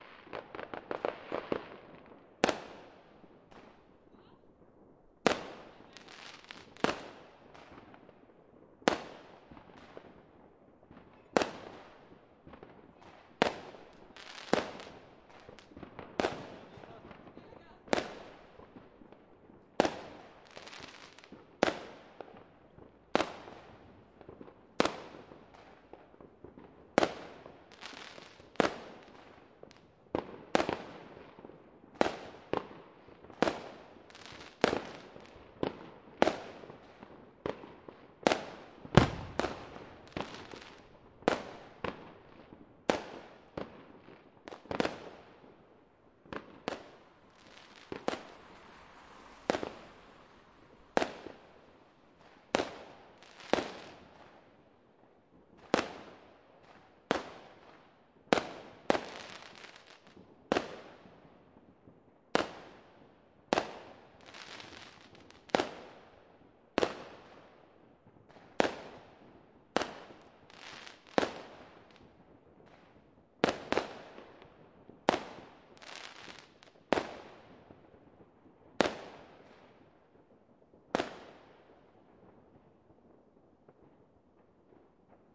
Hey, the fireworks!
fire-crackers, fire-works, fireworks, newyear